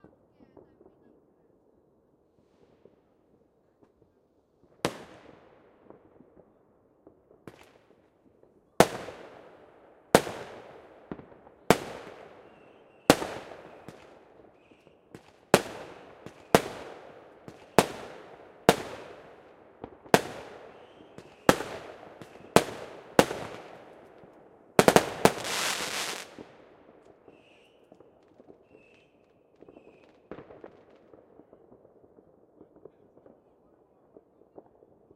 Some smallish fireworks at semi-close perspective, not much cheering.
AKG C522, DMP3, M-Audio 1010, Ardour.